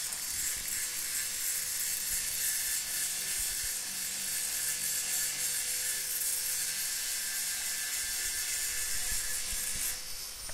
Man grinding with little hand grinder in my backyard.
Recorded with tascam dr-07 A/B from balcony (cca 7 meters high)
metal, industry, tools